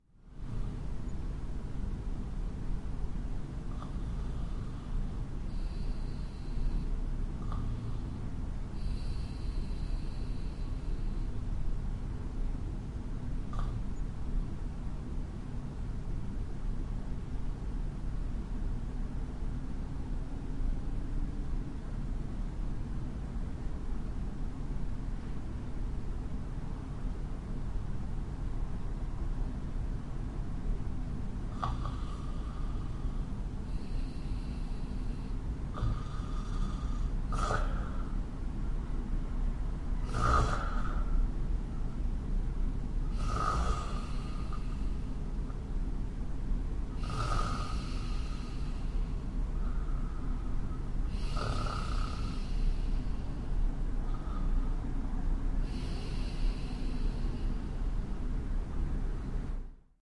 I'm asleep and stop breathing for a while. It's what is called an Apnoea. Lot's of people, especially those who snore, have this in their sleep. It sounds a bit frightening but it's harmless. Besides this silence there is the usual urban sound at night or early in the morning and the continuously pumping waterpumps in the pumping station next to my house.
bed, body, breath, human, street-noise